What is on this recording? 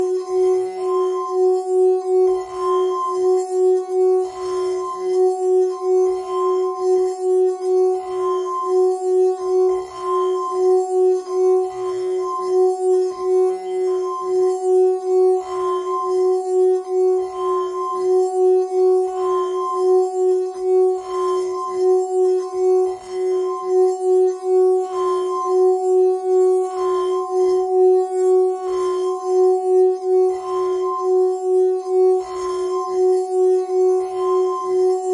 Wine Glass Sustained Hard Note F#4
Wine glass, tuned with water, rubbed with pressure in a circular motion to produce sustained distorted tone. Recorded with Olympus LS-10 (no zoom) in a small reverberating bathroom, edited in Audacity to make a seamless loop. The whole pack intended to be used as a virtual instrument.
Note F#4 (Root note C5, 440Hz).
pressure, water, loop, instrument, tone, note, melodic, glass, hard, pressed, clean, noisy, tuned, drone, texture, wine-glass, sustained